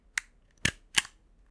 Using a Paper Stapler - single
office, paper, stapler